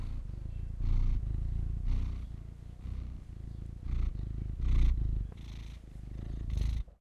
Common cat purring close to my Zoom H4N. Edited in Adobe Audition.
cattus, feline, purr, felis, cat